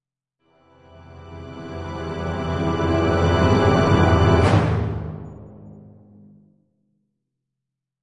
Robo Walk 01D
Orchestral suspense cluster using various instruments in a crescendo fashion.
Suspense, Orchestral, Cluster